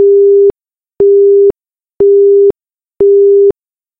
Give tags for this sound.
400; 400hz; beep; British; busy; phone; signal; telephone; tone; tones

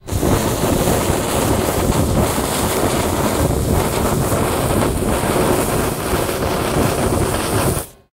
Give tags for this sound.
burn; fuse; fireworks; explosive

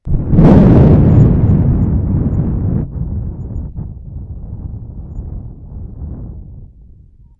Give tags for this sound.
Loud; Thunder; Weather; Thunderstorm; Storm; Lightning

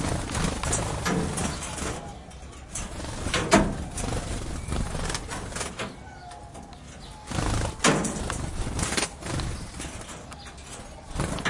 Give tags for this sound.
take-off; Birds; bird; flap; wing; wings; caged

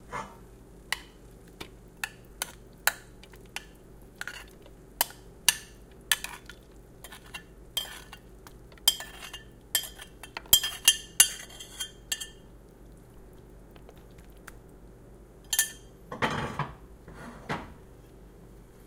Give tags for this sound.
kitchen; domestic-sounds; cooking; field-recording

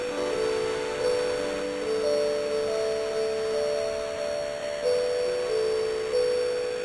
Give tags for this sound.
acid; alesis; ambient; base; bass; beats; chords; electro; glitch; idm; kat; leftfield; micron; synth

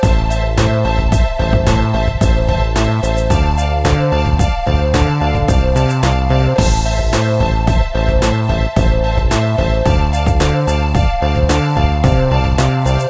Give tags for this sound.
battle; game; gamedev; gamedeveloping; games; gaming; indiedev; indiegamedev; loop; music; music-loop; victory; videogame; Video-Game; videogames; war

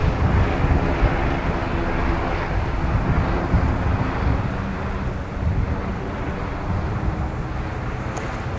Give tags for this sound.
field-recording; transportation; ambience; helicopter; engine; auto

